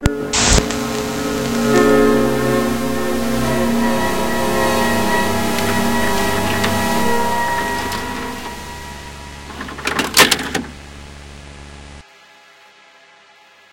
VHS Tape Brand Intro
VHS, Oldschool